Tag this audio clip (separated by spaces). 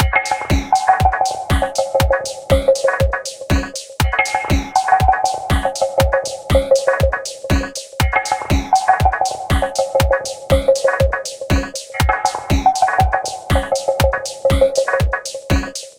120bpm beat conga congas crazy-percussion flanger loop original percussion rhythm rhythmic